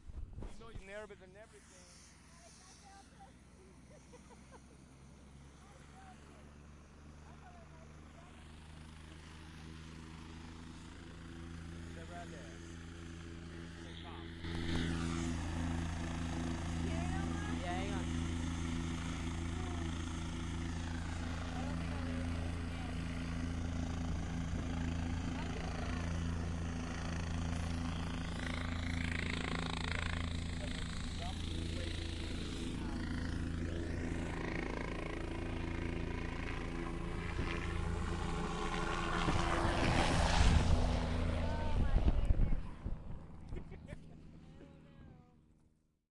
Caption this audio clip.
helicopter leave
Same helicopter, taking off, leaving us on the ground. Note, you may or may not be able to hear the wind that follows after the helicopter.
engine, exterior, helicopter, leaving, loud, motor, outside, take, take-off, wind, winds